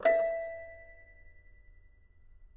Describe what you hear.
Toy records#22-E4-01
Complete Toy Piano samples. File name gives info: Toy records#02(<-number for filing)-C3(<-place on notes)-01(<-velocity 1-3...sometimes 4).
instrument, toypiano, sample, piano, toys, toy, samples